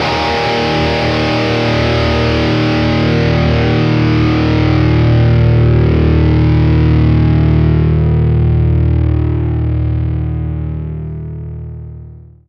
D#2 Power Chord Open